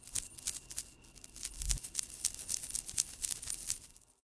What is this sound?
Sound 2-Edited
This sound is a collection of Alex and Ani bracelets being hit. The sound has been reversed and the tempo has been sped up slightly.
MTC500-M002-s14, LogicProX, Tempochange, Reversed